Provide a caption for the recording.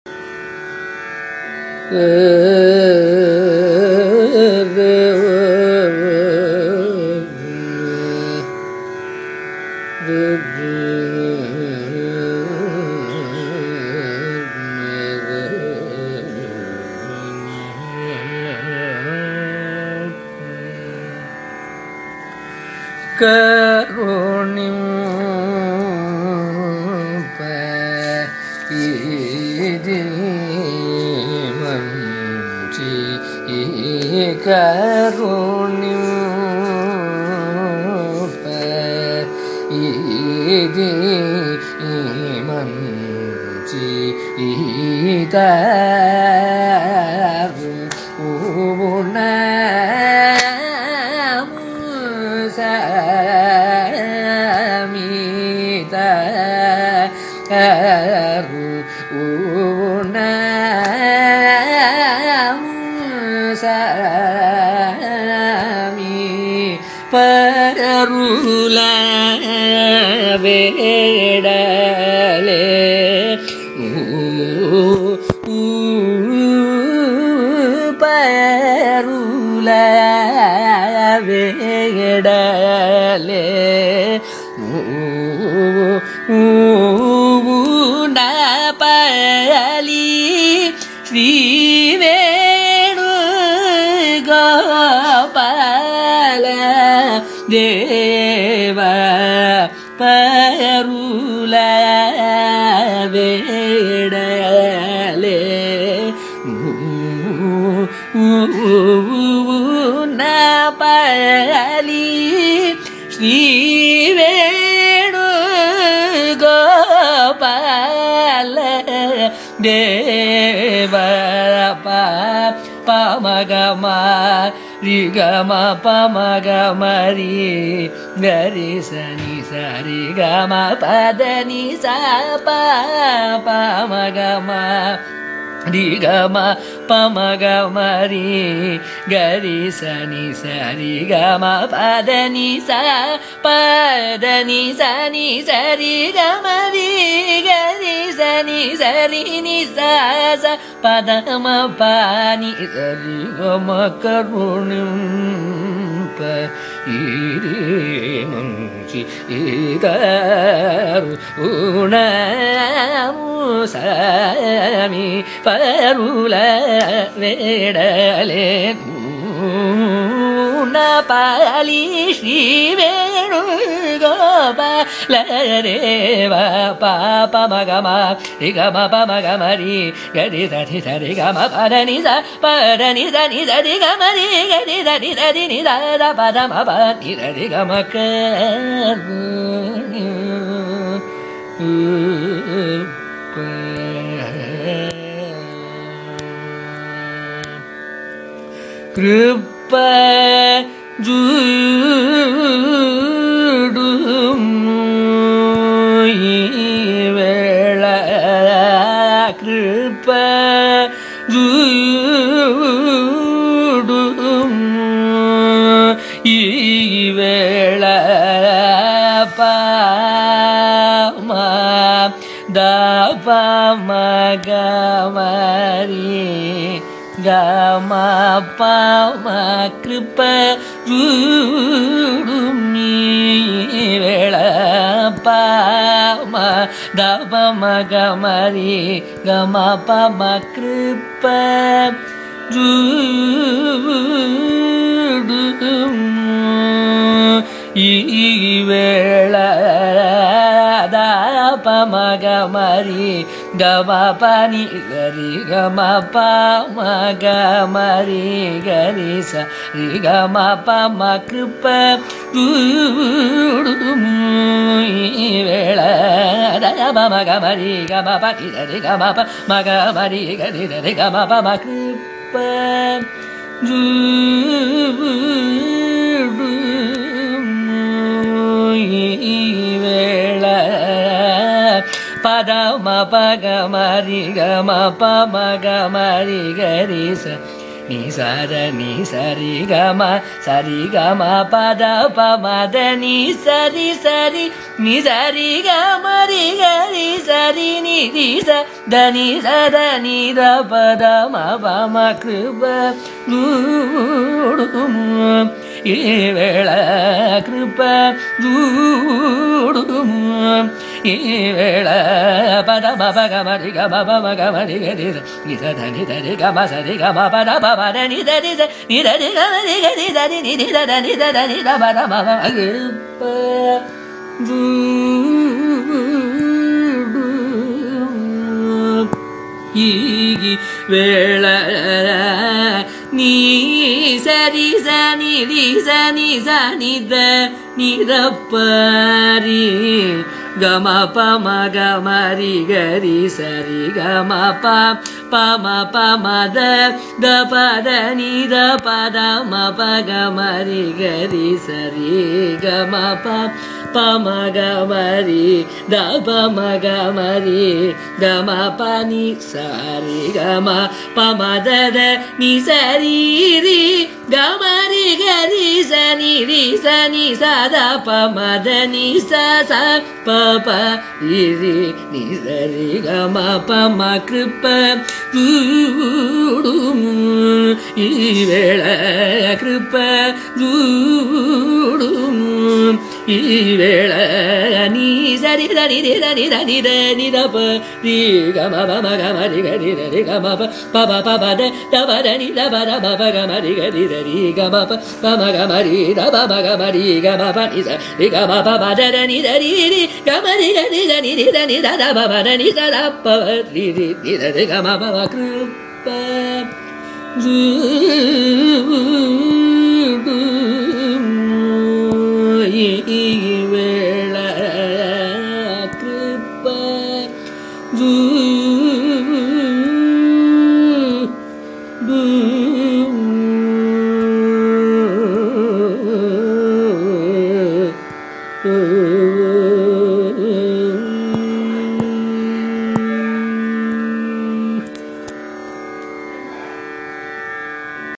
Carnatic varnam by Ramakrishnamurthy in Sahana raaga
Varnam is a compositional form of Carnatic music, rich in melodic nuances. This is a recording of a varnam, titled Karunimpa Idi, composed by Tiruvotriyur Thiyagaiyer in Sahana raaga, set to Adi taala. It is sung by Ramakrishnamurthy, a young Carnatic vocalist from Chennai, India.
carnatic-varnam-dataset, iit-madras, carnatic, music, compmusic, varnam